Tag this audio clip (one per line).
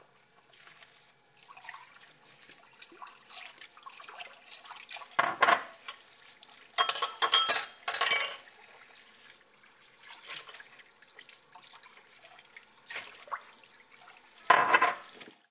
wash
dishes
water
kitchen
sink